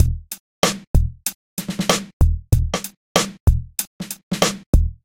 95 hip hop
layered snare with a straight8 hihat. bassdrum layered with a synth sound for extra pump.
drums, beat, sequenced